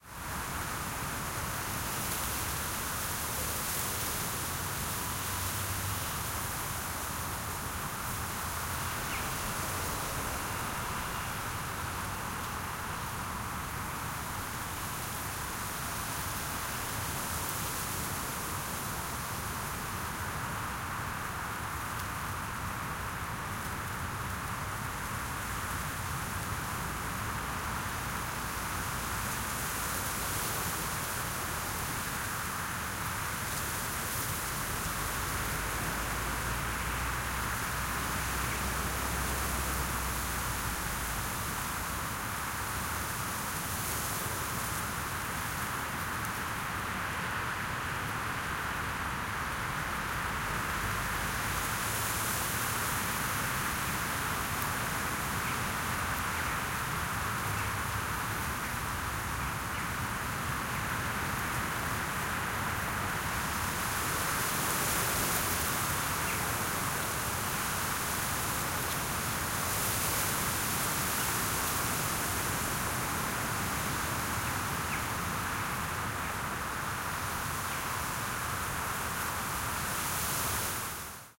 close up of high grass rustling in "mistral" strong wind. some swifts. From various field recordings during a shooting in France, Aubagne near Marseille. We call "Mistral" this typical strong wind blowing in this area. Hot in summer, it's really cold in winter.
hugh, grass, rustle, france, mistral, aubagne, gust, wind